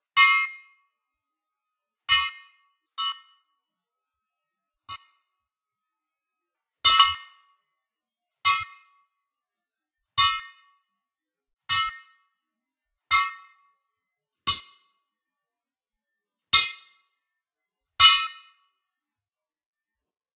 Sword fight single hits
I made this by hiting a piece of plastic on an empty insecticide can.
war, insecticide, medieval, knight, can, combat, axe, fighter, military, battle, swords, gun, fight, warfare, training, fighting, metal, spray, army, attack, clanging, soldier, sword, shield